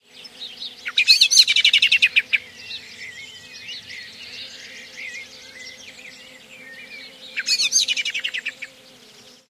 20170501 blackbird.alarmed
Blackbird alarm callings. Primo EM172 capsules inside widscreens, FEL Microphone Amplifier BMA2, PCM-M10 recorder